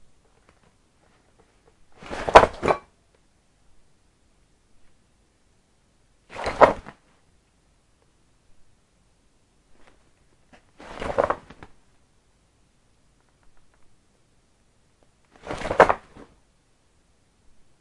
Rolling bag out
The sound of me rolling out a bag. I recorded it for a foley test on a bank robbery scene. Recorded with an Zoom H4n.
rustling, rustle, bag, Rolling, fabric, foley